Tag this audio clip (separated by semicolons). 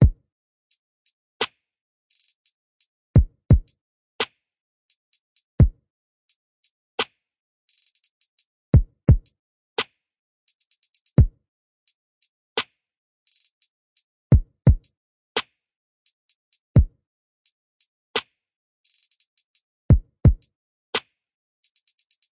beat; clap; drum; drum-loop; drums; hat; hi-hat; hip-hop; hip-hop-drums; hip-hop-loop; kick; loop; percussion; percussion-loop; rap; snare; trap